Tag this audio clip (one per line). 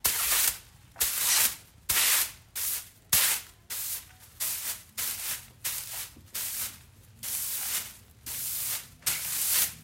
floors
sweep
broom
OWI
sweeping
swish
swoosh